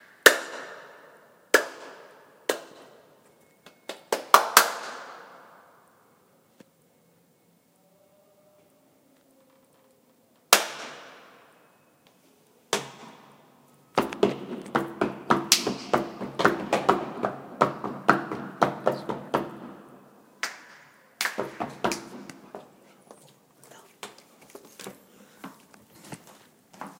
echo ljubljana castle
Me and my friends trying the echo in one of the rooms of the Ljubljana Castle in Ljubljana. September 2012.
castle, ljubljana, echo, field-recording, clapping